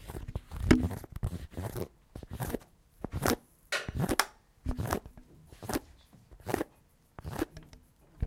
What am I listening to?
Here are the sounds recorded from various objects.
france, glue, lapoterie, mysounds, rennes